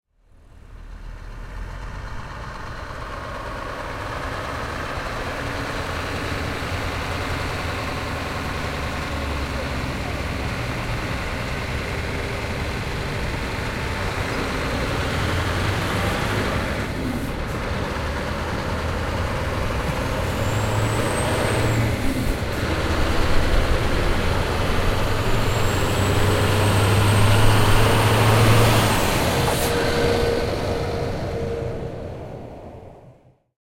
Sound of a truck passing slowly during traffic jam on French highway (A7). Sound recorded with a ZOOM H4N Pro and a Rycote Mini Wind Screen.
Son d’un passage de camion à faible vitesse lors d’un embouteillage sur l’autoroute A7. Son enregistré avec un ZOOM H4N Pro et une bonnette Rycote Mini Wind Screen.